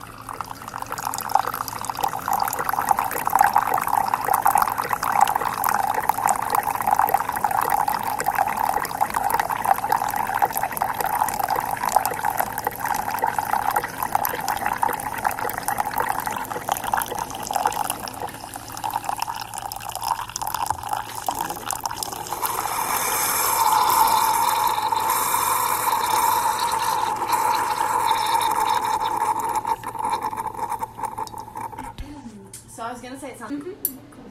Coffee Maker
A keurig coffee-maker dispenses an 8 oz cup of steaming coffee into a ceramic mug.